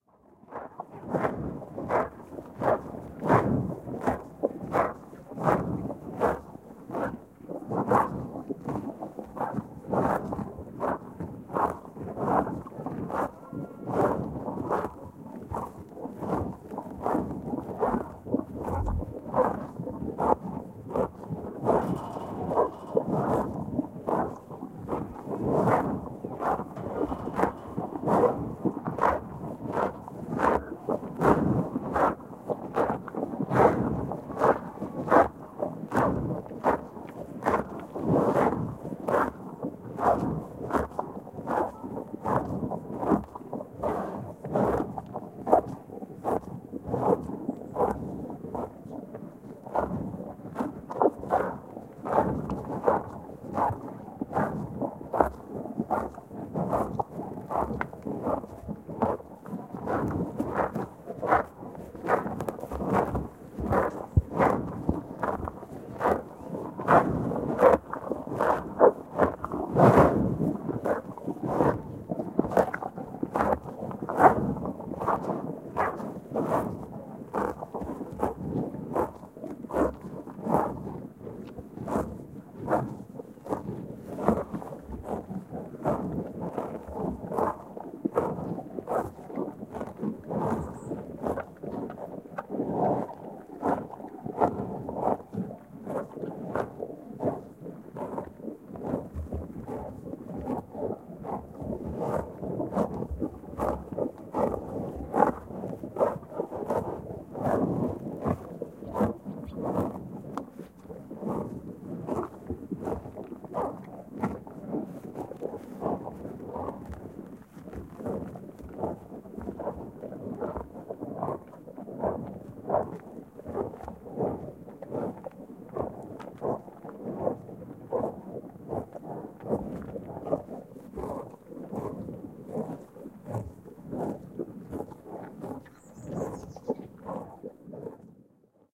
A stereo field-recording of a cow grazing and breathing heavily against the ground.Some vocal children are faint in the background. Rode NT-4 > FEL battery pre-amp > Zoom H2 line-in.